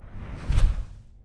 Microphone Used: Tascam DR07-MKII
DAW Used: Audacity & Reaper
Objects Used: Used breathing gently into microphone alongside a few whistles with the rustle of tin foil, paper and cling film and brown noise. Pitch slide was added alongside an envelope to create the fly-over effect.
Added low bass thud for the impact (It's fairly lacking in higher frequencies but I used an explosion sound of mine for that and kept the two sounds separate!